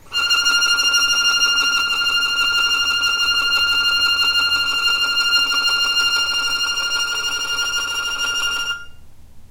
violin tremolo F5
tremolo, violin